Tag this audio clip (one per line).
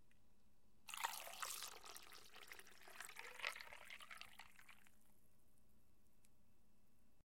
cubes
fill
filling
glass
ice
over
pouring
water